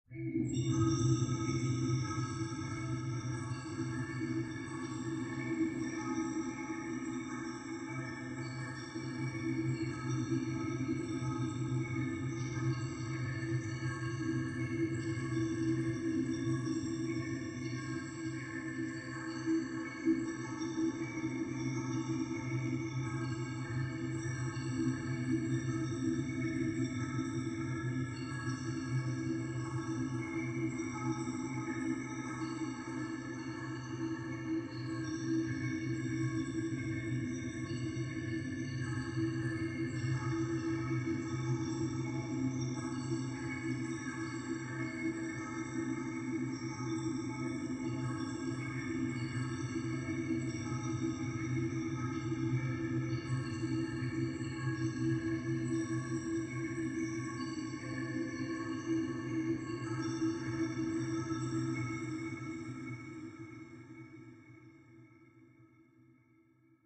Result of a Tone2 Firebird session with several Reverbs.